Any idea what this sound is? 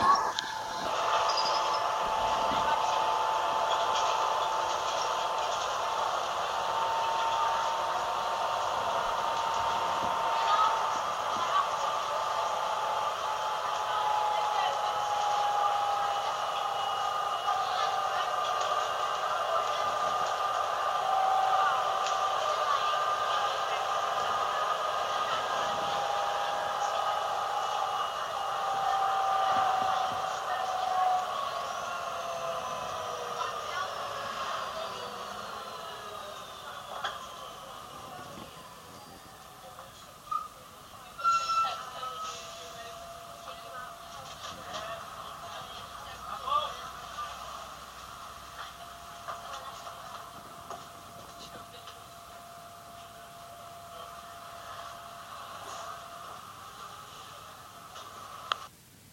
Quite simply, a bus journey! It gradually arrives at a stop, brakes and stops to let people on and off. Quality not brilliant (it was recorded on my phone, not my iPod) but at least it's something.
public, field-recording, public-transport, transport, people, bus